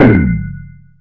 Techno/industrial drum sample, created with psindustrializer (physical modeling drum synth) in 2003.
drum, industrial, metal, percussion, synthetic